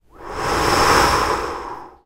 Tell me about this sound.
Air Wind Whiff Blow
A short blow effect for transitions/whoosh effects.
Recorded with Zoom H2. Edited with Audacity.